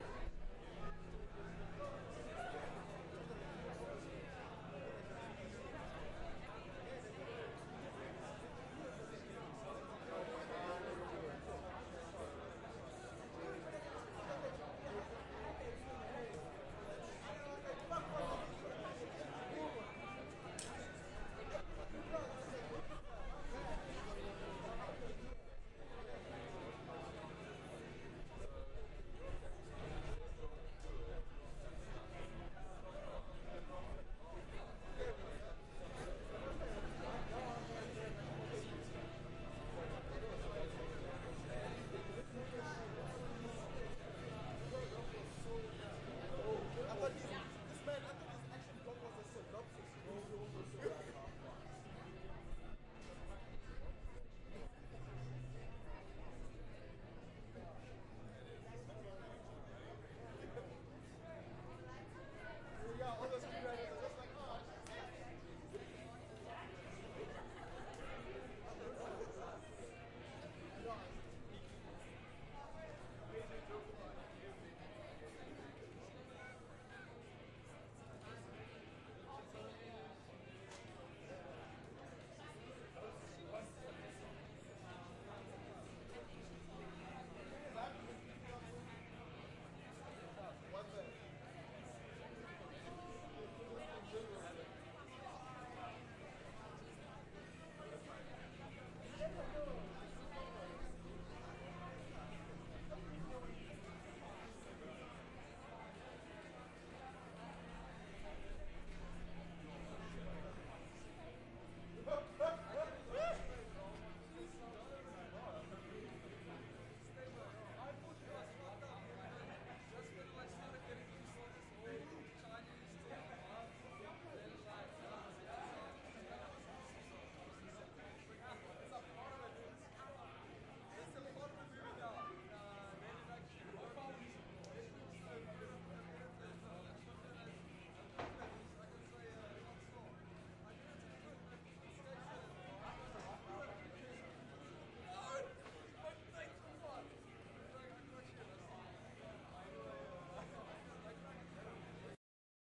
College campus mid afternoon lunch (ambience)
A lunch break at Open Window Institute, many students milling about with several conversations happening at once. Recorded with a Zoom H6 portable digital recorder, X/Y microphone capsule.
ambiance, Young-adults, stereo, OWI, general-noise, Lunch-break, background, atmosphere, South-Africa, field-recording, mid-afternoon, students, ambience, background-sound